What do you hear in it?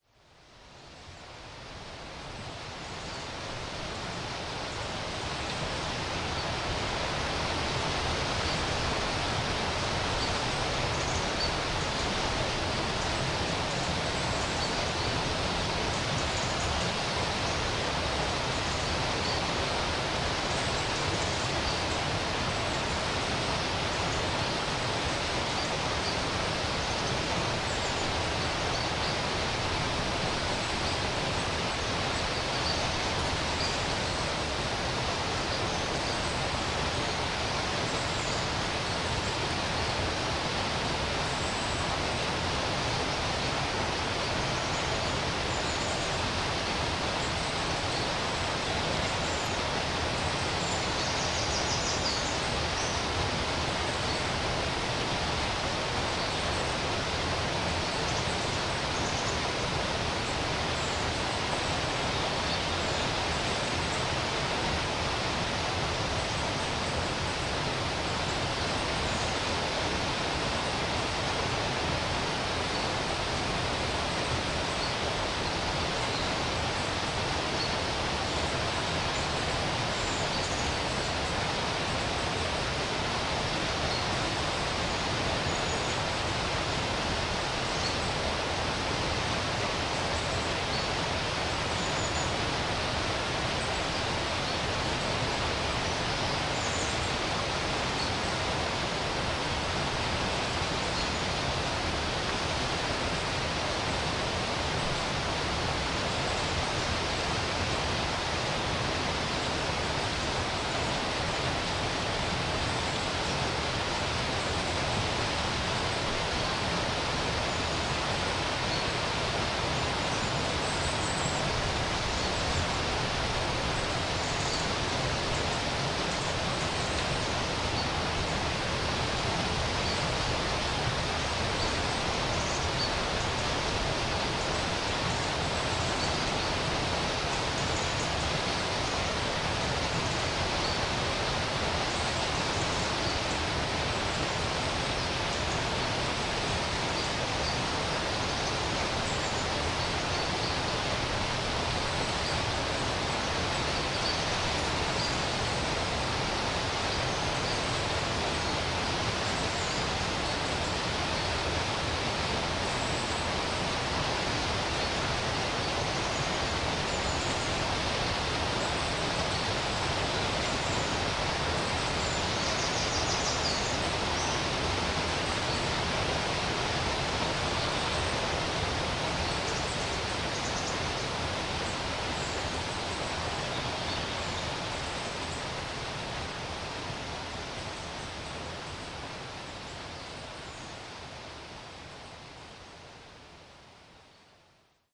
Recording of the River Foyers from the top of a cliff.